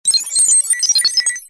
Computer sounds accepting, deleting messages, granting access, denying access, thinking, refusing and more. Named from blip 1 to blip 40.
blip, computer, sound